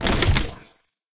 An artificially designed user interface sound with a mechanical aesthetic from my "UI Mechanical" sound library. It was created from various combinations of switches, levers, buttons, machines, printers and other mechanical tools.
An example of how you might credit is by putting this in the description/credits:
And for more awesome sounds, do please check out the full library or SFX store.
The sound was recorded using a "Zoom H6 (XY) recorder" and created in Cubase in January 2019.